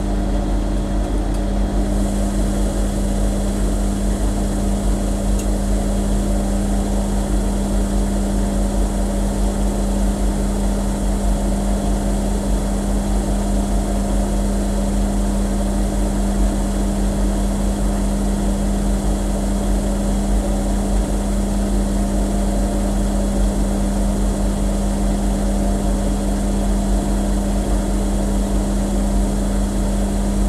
This morning I made 2 recordings of the sound of my washing machine. I used a cheap web mic placed on top of the washing machine during the normal wash cycle. Each sample is about 30s long.This is the raw unprocessed sound original sound.Over the coming days I will add processed versions to this sample pack. Using filters and other effects, my aim will be to create rhythmic loops from these sounds.
cheap-mic, experimental, lo-fi, lofi, noise, noisy, raw, unprocessed, untreated, washing-machine